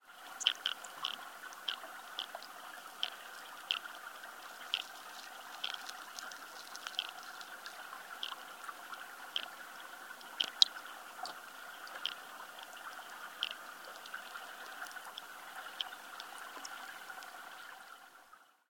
Short mono extract from an underwater recording from the West coast of Scotland, on the mainland near the Isle of Skye. I don't know what animals make these noises, but I'd certainly like to...
Hydrophone resting on the bottom of some shallow water.
Only processing is some amplification. Recorded with JrF hydrophones and Sony PCM-M10.
animal, field-recording, hydrophone, underwater, unprocessed